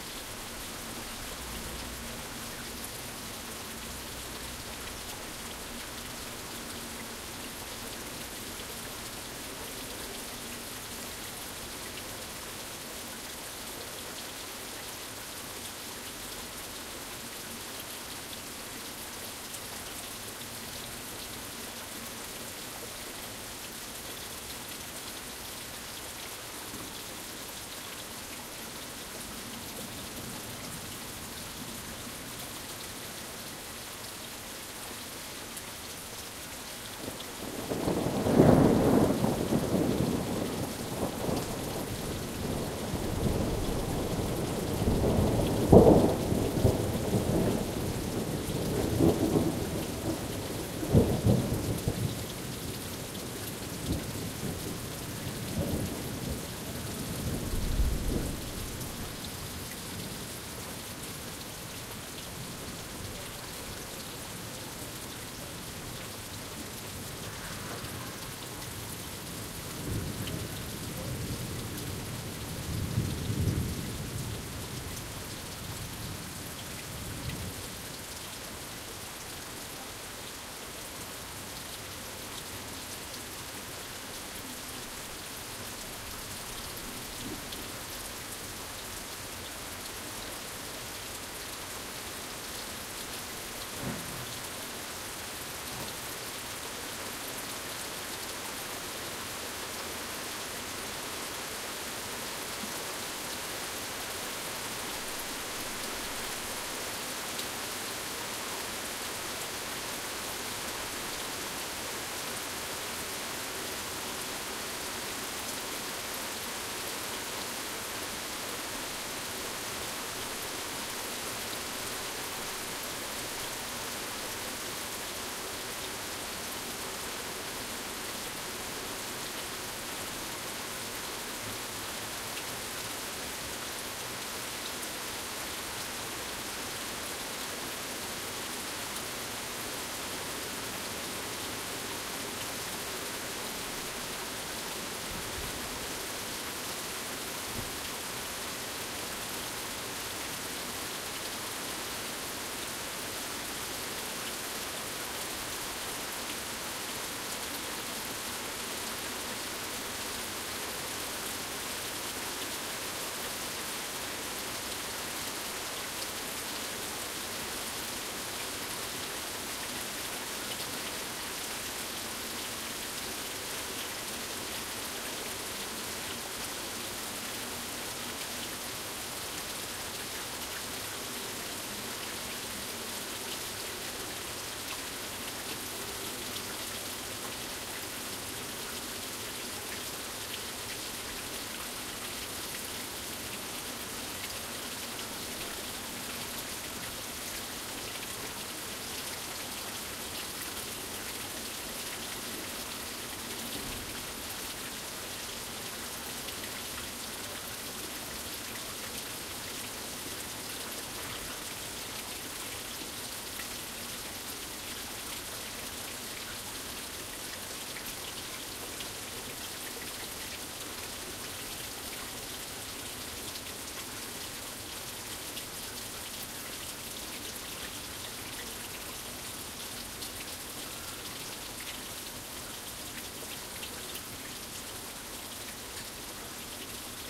Ambient sound of rain and thunder out of a Berlin window.
Crediting is not necessary but appreciated.
Recorded with a Zoom H2n.
nature, thunder, weather, city, rain, ambient, lightning, raining, h2n, zoom, field-recording, storm